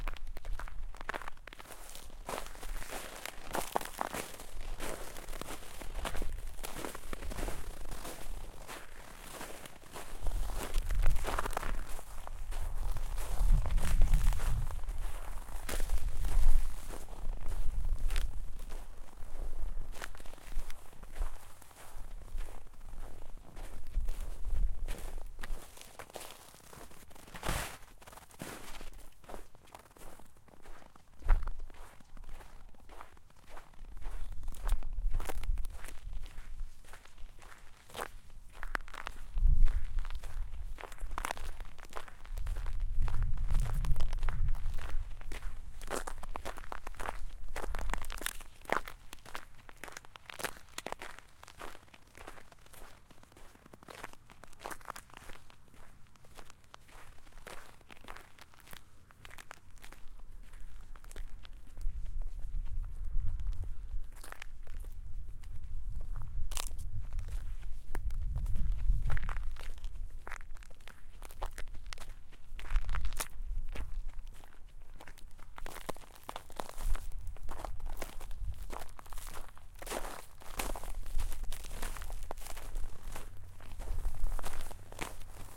Walking through hard snow and ice at night, fairly windy.